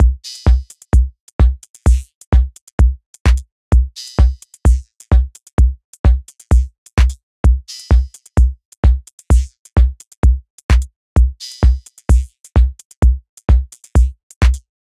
Minimal Techno drum loop extracted from an Ableton project that I chose to discontinue. No fancy effects, just a simple drum pattern with some elements.